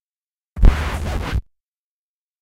The result of a blending of a couple different sounds in Figure53's QLab program made to sound like a violent needle rip on a record. Beefier than the common 'zipper' sound.